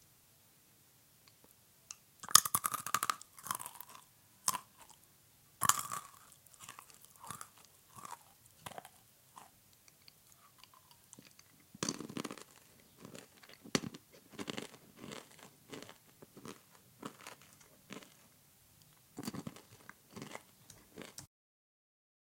Chewing something crunchy